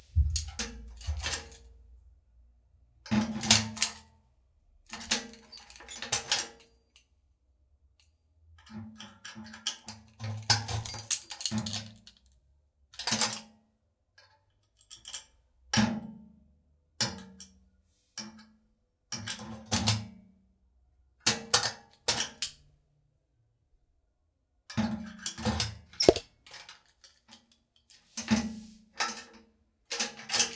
safe deposit box lock +chain
metal safe deposit box lock and chain various. This sounds offmic to me, probably because I confused recording from front or back on my H2- and yet I don't hear my own breathing. anyway it's still good for anything but a cu
lock, safe, metal, deposit, box, chain